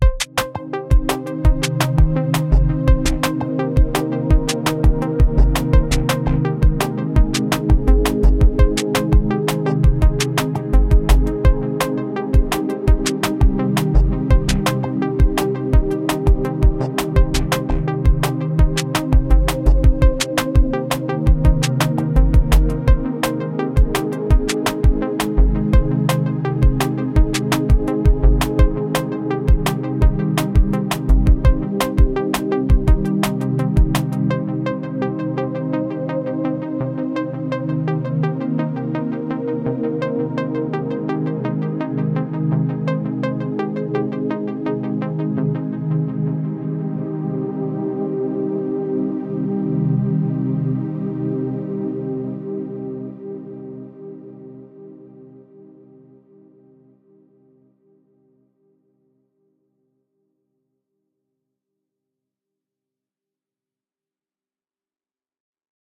Duality - Minimalist Instrumental for Podcasts & Videos
"Duality" is a minimalist instrumental track that captivates listeners with its repeating melody and gradual build in intensity. The simplicity of the composition doesn’t diminish its emotional power; instead, it creates a hypnotic effect that draws the audience into the heart of your content.
Its repetitive and understated style seamlessly integrates with other audio or visual elements, enhancing the mood without overshadowing your message.
Imagine pairing "Duality" with visuals like a city skyline split between progress and decay. The track's minimalist sound highlights the emotional complexity of such imagery, creating a thought-provoking experience for your audience.
FAQs:
Can I use the music in my video game or app?
Absolutely!
Can I remix or adapt the music?
Make "Duality" the backdrop of your next creative project, and let its minimalist charm amplify your message.
How Can YOU Help?
First of all, I am humbled and honoured that you find my music inspiring for your project.
minimalist, repetitive, movie, cinematic, atmospheric, podcast, ambient, reflective, music, background-music, soundscape, hypnotic, instrumental, mood-music